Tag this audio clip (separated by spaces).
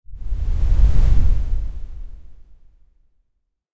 effect woosh sfx whoosh noise fx future swash space wave swosh wind wish scifi swish fly soundeffect transition swoosh